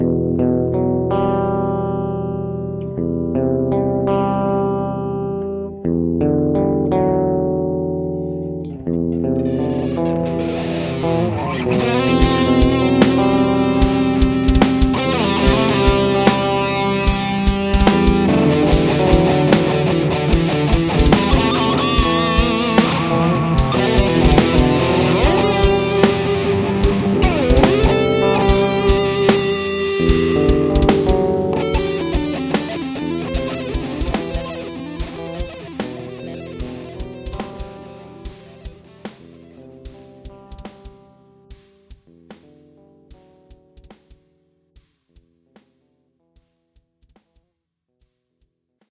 recorded with a jackson dinky, line 6 pod ux1, and audacity